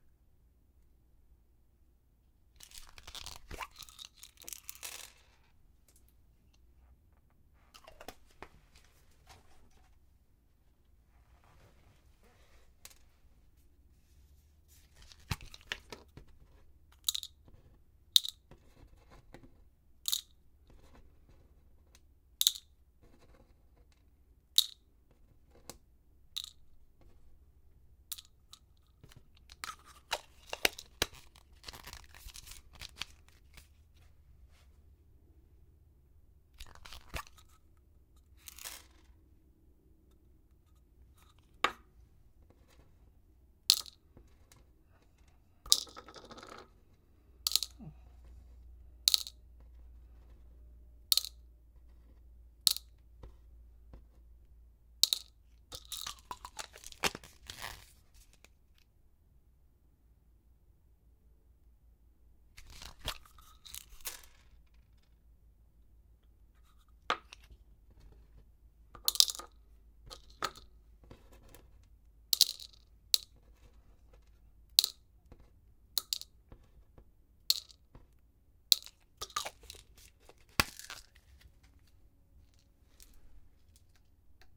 Little pills splay out onto a wooden desk. The act of picking up the pills and being dropped in has also been recorded.
Equipment: SD552 & Sennheiser MKH50